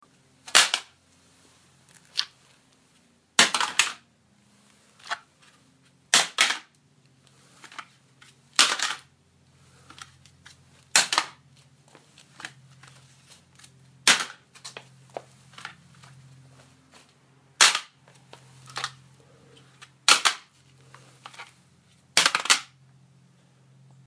This is a smartphone breaking when dropped.